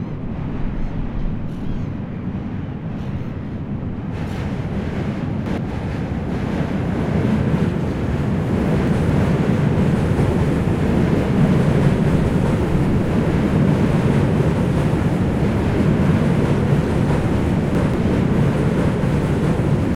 Train near river
train, river, city, water